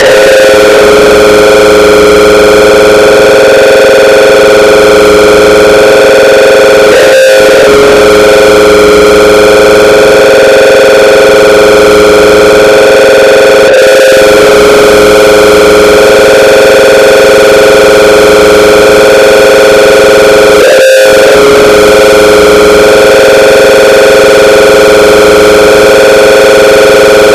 Distorted sin wave scream 6 [LOUD]
dark, distortion, noisy, loud, hard, processed, distorted, sfx, experimental, vst, noise, hardcore, flstudio, sine, gabber